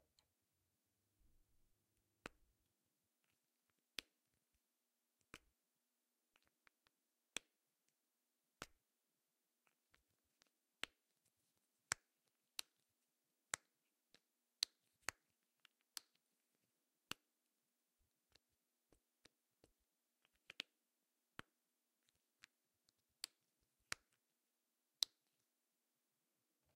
Pen Cap Removal
A snapping pen cap being taken off then put back on.
cap, off, pen, removing